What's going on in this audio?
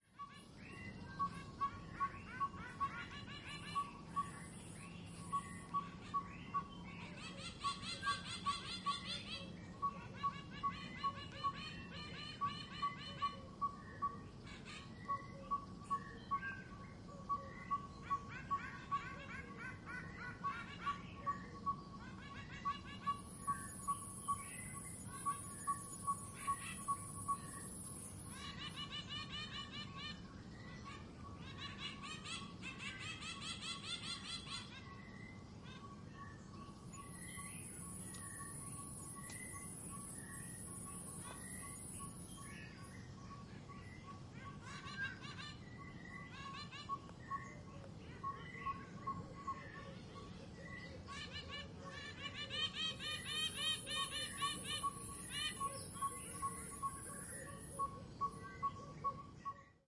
South Africa - St. Lucia Forest & Bird Ambience
Morning in Eastern South Africa captured with Sony M10.
africa, ambi, ambience, bird, birds, birdsong, field-recording, forest, lucia, m10, morning, nature, sony, south-africa, st-lucia